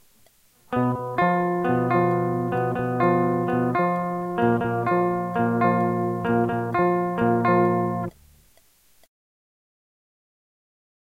CLN GUIT FX 130BPM 7
These loops are not trimmed they are all clean guitar loops with an octive fx added at 130BPM 440 A With low E Dropped to D
2-IN-THE-CHEST, REVEREND-BJ-MCBRIDE, DUST-BOWL-METAL-SHOW